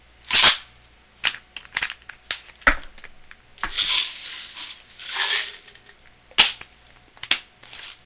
Two types of pill bottles being opened, contents removed, and closed (layered audio)
rattle, medicine, pill